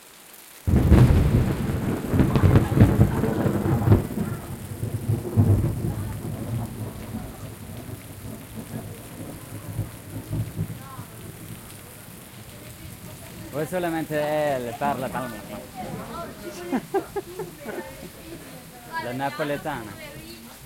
2015 Thunderstorm Super Strike but people talk a bit
A thunderstorm which i recorded with my OKM mics in binaural at a little agritourismo in Italy near Montiano.
Unfortunately some people talk in the background but the strike is great i think!
Btw this Agritourismo is the best ever. The food is just outstanding!
binaural, field-recording, flash, italy, lightning, nature, OKM, storm, strike, summer, thunder, thunder-storm, thunderstorm, weather